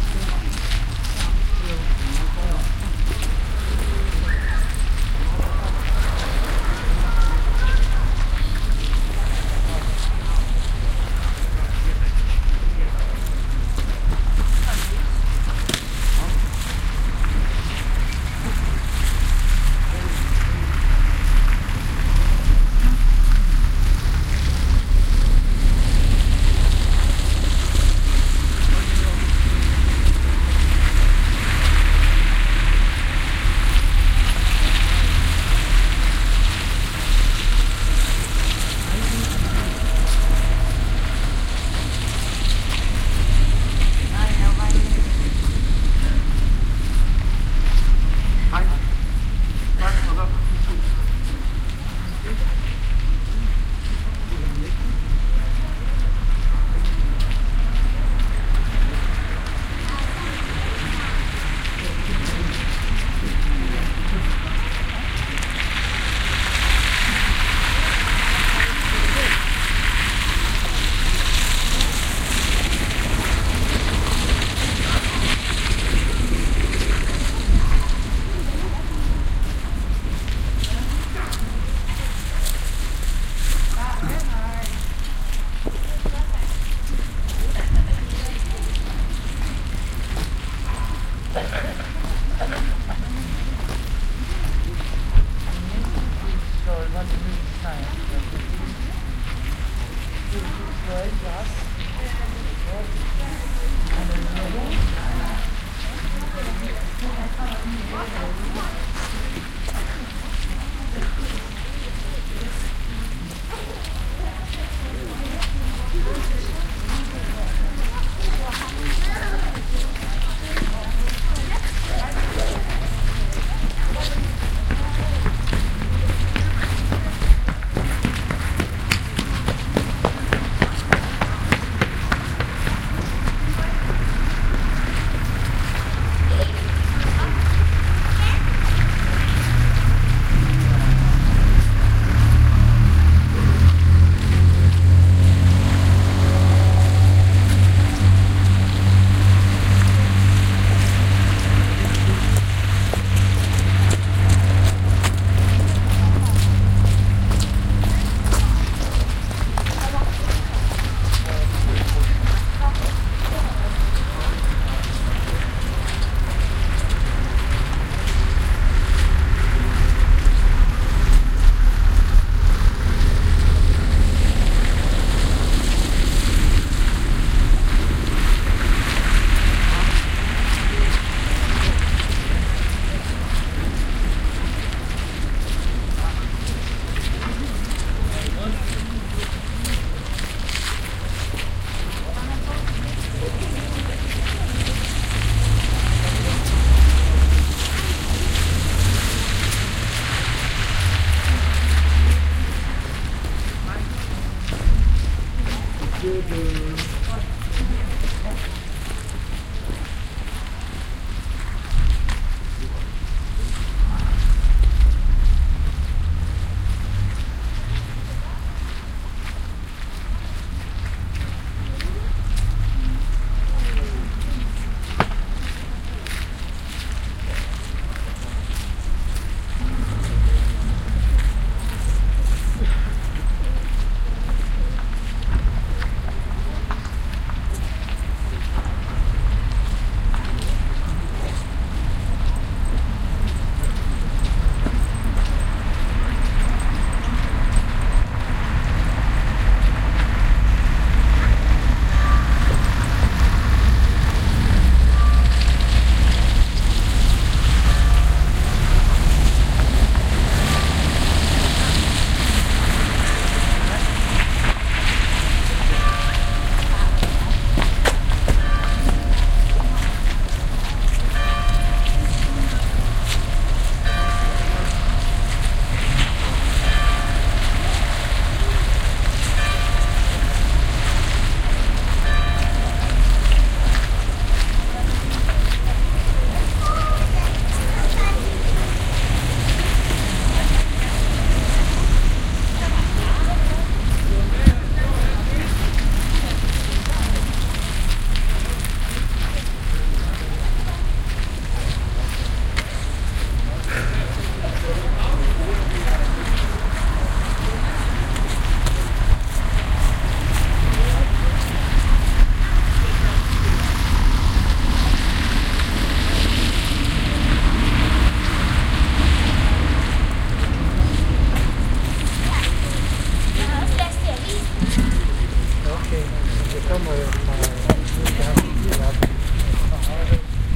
town square in varde 01
Recorded in the middle of the town square in Varde, Denmark. I sat down on a bench, waiting for the bells to announce the twelve o clock ring. Lots of people, cars and chatter.
Recorded with a Sony HI-MD walkman MZ-NH1 minidisc recorder and a pair of binaural microphones. Edited in Audacity 1.3.9.
background-ambience, bells, cars, ding, footsteps, people, talk